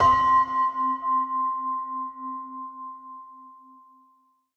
Recorded bell processed to use as an instrument.
I took this sample into Melodyne and moved all of its frequencies into "C" range. This gets rid of harmonics and makes the sample well-suited to use as a musical instrument.
I prefer the original sample for some purposes. Unprocessed, it has a richer sound. And I also truncated to get rid of a tricky bit I couldn't fix. And I combined stereo tracks into mono, since that works better for an instrument where one wants to control panning inside of a composition.
So as a pure sound effect, I recommend the original. This version is just useful as an instrument.
bell, clang, instrument, melodic